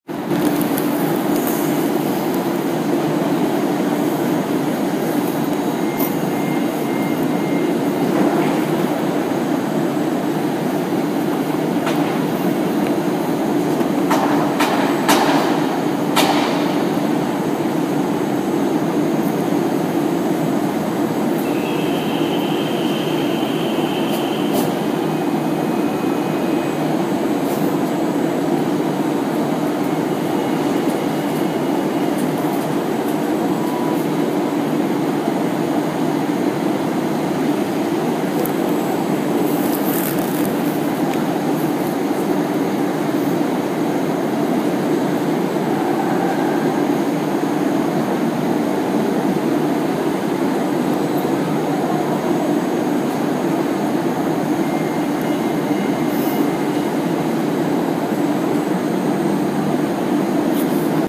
noise collection
the sound of Amsterdam central station
noise, echo, blowing